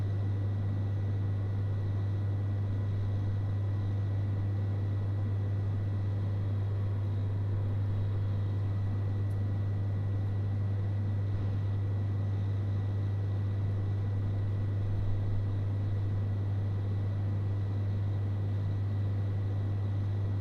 coffee vending machine
An automatic coffee machine. Recorded in an empty university common room. The first minute or so is us putting money in, but with some editing you get a great sample for an exterior coffee vendor. recorded using an Edirol R4 and shotgun mic
coffee-machine, edirol-r4, field-recording